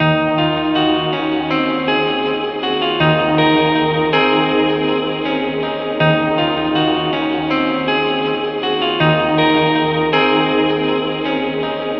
Ambient guitar riff that loops inside a sampler instrument. Can also be used to set and begin the tone of something else.